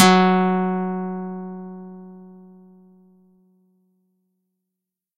Celtic Harp -F# 3
All sounds are created with the pluck-.function of audacity.
I modified the attack phase, changed some harmonics with notch-filter and
Lowpass.
For the pluck noise I used a bandpass- filtered white noise.
Harp, Ethnic